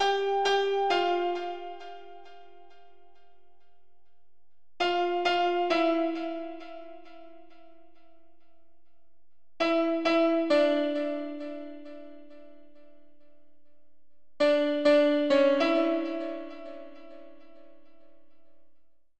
100 12 inch nails piano 01
organic, piano, sound, inch, free, melodic, loop, nails, 12